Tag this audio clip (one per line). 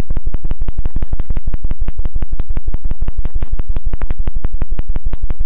bass
broken
glitch
oscillator